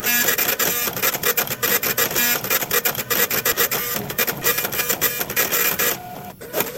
epson receipt printer4
this epson m188b printer is found in Manchester INternational Airport at a store in Terminal 3. It is printing out a receipt.
This can be used for a receipt printer, a kitchen printer, a ticket printer, a small dot matrix printer or a game score counter.
Recorded on Ethan's Iphone.
android, business, computer, computer-printer, dot-matrix, electrical, electromechanical, game, kitchen-printer, machine, mechanical, point-of-sale, print, printer, printing, receipt, robot, robotic, slip-printer, technology, ticker